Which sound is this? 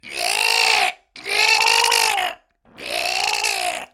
Zombie - subject C - zombie brat - crying.
Alien, Creature, Cry, Design, Fi, Fiction, Fiend, Ghoul, Horror, Monster, Sci, Science, Sci-Fi, Sound, Vocal, Voice, Zombie